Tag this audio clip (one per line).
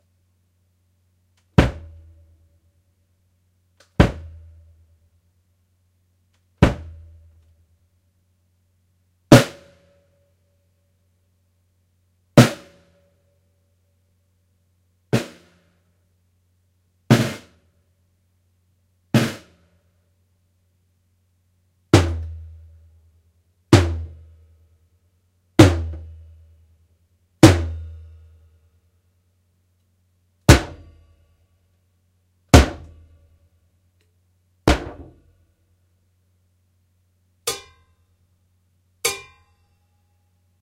drum
kick
low
misc
snare
tom